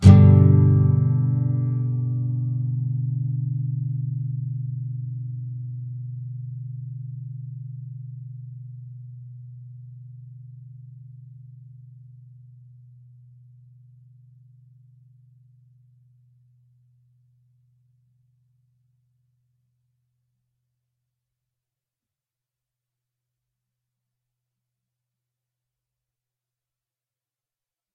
Standard open G chord except the B (2nd) string which has the 3rd fret held. Down strum. If any of these samples have any errors or faults, please tell me.
clean, nylon-guitar, guitar, acoustic, open-chords